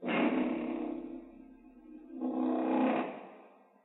spb door1
a poorly recorded sound of a heavy metal door